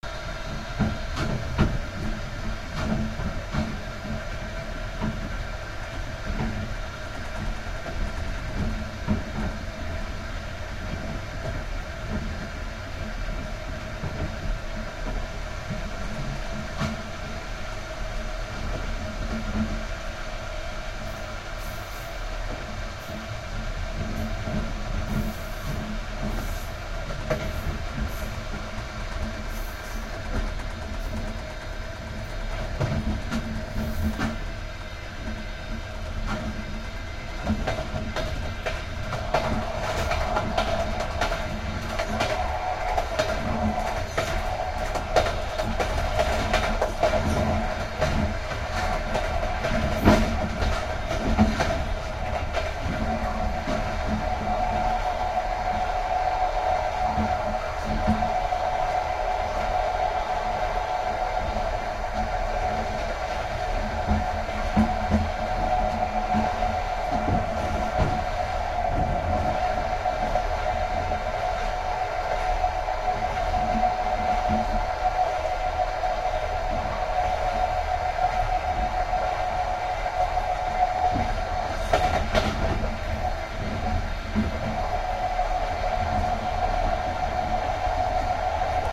Railway wagon WC (toilet) interior